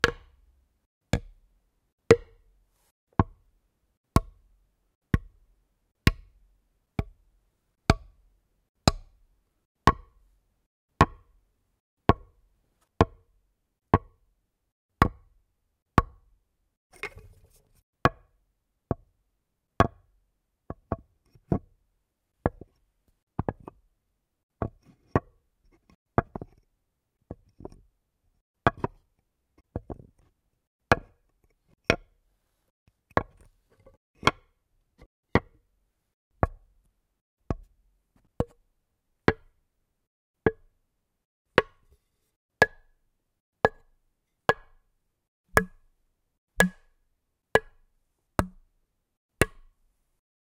golpes en madera
madera, close-up, percussive